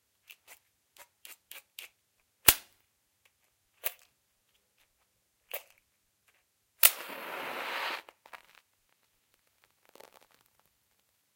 the lightning of a match / encendido de una cerilla